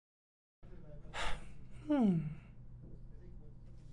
49-suspiro mujer
A woman sigh
sigh, voice, female, woman, girl